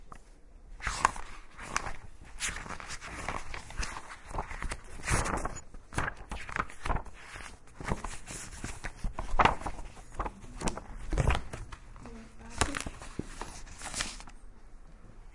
Sounds from objects that are beloved to the participant pupils at the Can Cladellas school in Palau-solità i Plegamans, Barcelona. The source of the sounds has to be guessed.